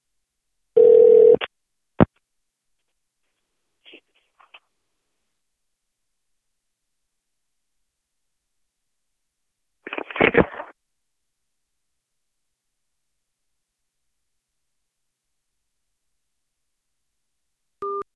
Phone rings, call receiver answers call, silence, then hangs up phone.
call, calling, dial, house-phone, land, land-line, line, phone, ring, ringing, telephone, tone